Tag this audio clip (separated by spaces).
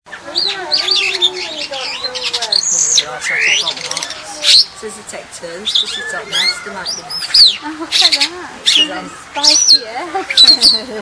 Exotic singing Superb Starling bird